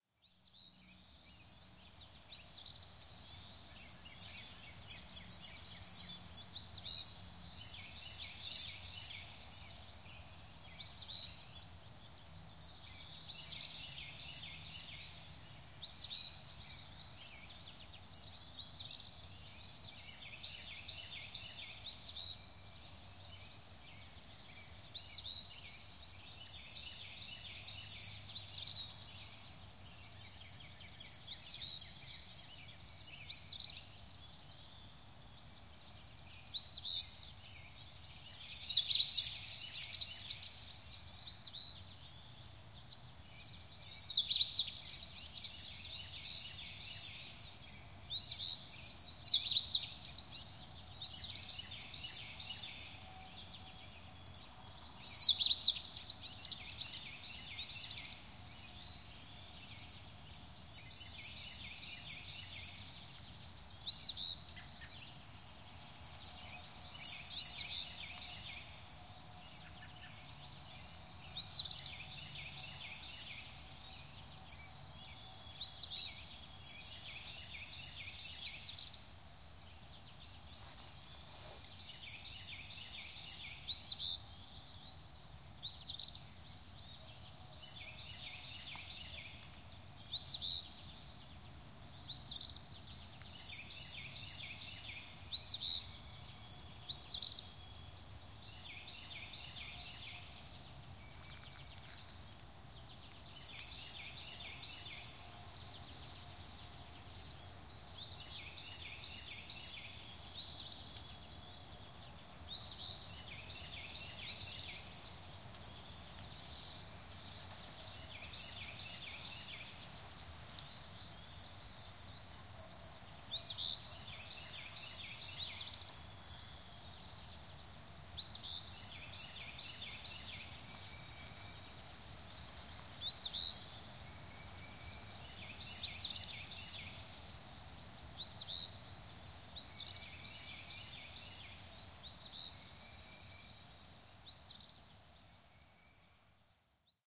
SpringBirdsNearForestCreekApril10th2013
Midwestern forests can be quite noisy during the early spring when the various neo-tropical migrants invade the woods to get fat of insects and to raise a family.
In this recording, made on the bank of a small creek situated in a ravine, you will hear various birds including, Northern Parula, Louisiana Waterthrush, an Eastern Phoebe, a Carolina Wren and an American Robin.
Recording made with my Handy Zoom H4N recorder propped up about 3 feet from the forest floor on a tripod and using the unit's internal, stereo microphones.
Enjoy
birds, field-recording, forest, louisiana-waterthrush, phoebe, robin, spring, wren